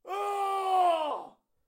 Battle Cry 6
Microphone Used: SM58
DAW Used: Reaper
Objects Used: Simply Recorded a friend of mine shouting into the microphone, microphone used popshield and used limiter and compression to avoid peaks
Cry, Scream